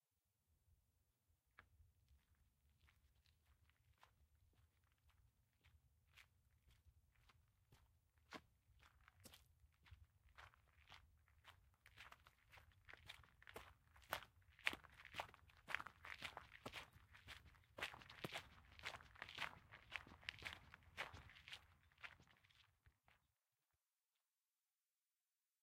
Walking on gravel - POV
Single person walking on gravel surface from the point of view of the walker. Ambient recording in a park.
Recorded with an H4n recorder and Shure SM63LB omnidirectional mic.